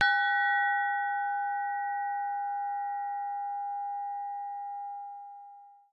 This is a recording of a burmese temple plate bell/gong. My parents bought this instrument for me. It comes from the samples I made whilst making the music for the show 'Little Leap Forward' by Horse + Bamboo theatre company in 2009.
bell, metal, little, bong, percussion, theatre, leap, asian, burma, forward, gong